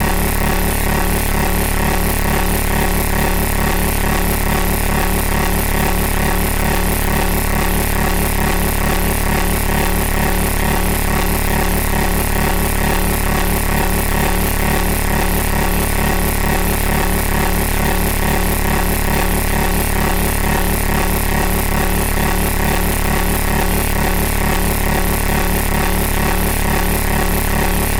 Harsh oscillating drone generated from a No-Input Mixer
Loop, No-Input, Drone, Feedback, Mixer